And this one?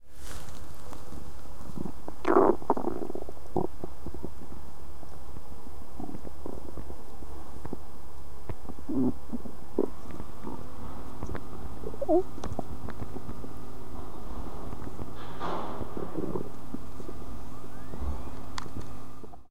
Brief recording of my stomach gurgling after lunch.